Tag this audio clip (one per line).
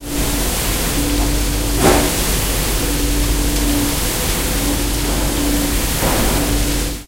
campus-upf
dishwasher
field-recording
UPF-CS14